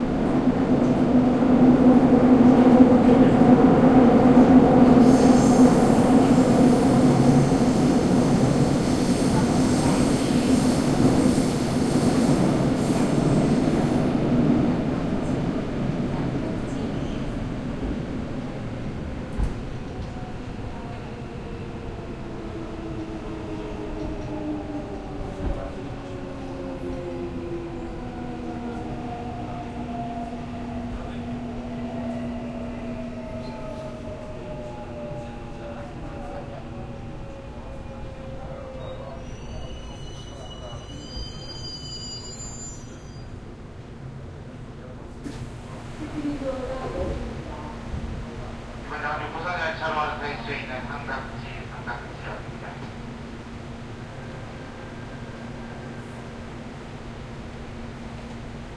Train 1, Seoul, South Korea